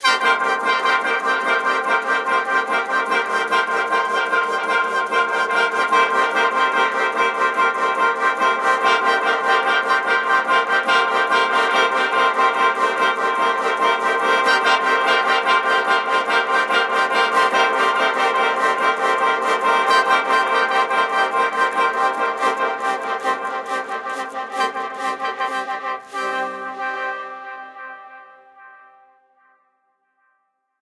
Khaen Symphony 2
Created by Kimathi Moore for use in the Make Noise Morphagene.
“The sounds I've tried sound very good for the Morphagene i hope, and are very personal to me. That was bound to happen, they're now like new creatures to me, listening to them over and over again has made them very endearing to me. I also added my frame drums which I thought would be a good addition, sound tools, heater, Julie Gillum's woodstove, and a small minimalist piano composition.. In addition to the roster 2 of them are from Liz Lang, whom I wanted to include here as she was my sound/composition mentor.”
mgreel, morphagene